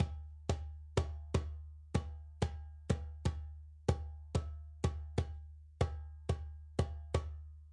Jerusalema 124 bpm - Djembe 1 - clap rhythm 4 bars
This is part of a set of drums and percussion recordings and loops.
Djembe 1 playing the rhythm of the hand claps.
I felt like making my own recording of the drums on the song Jerusalema by Master KG.